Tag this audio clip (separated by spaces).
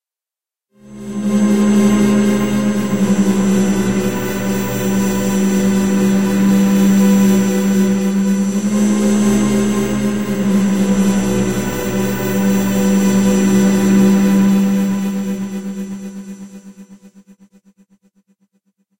rumble ambience